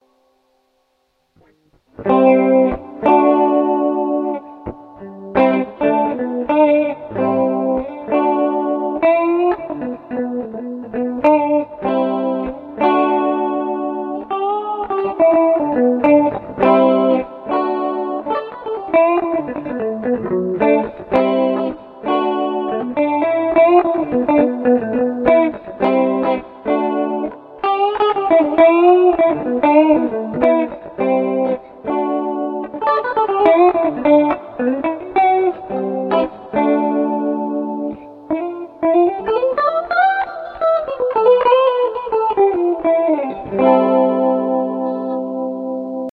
Guitar improvisation
Soul\blues improvisation with auto wah effet.
Guitar Rig was used.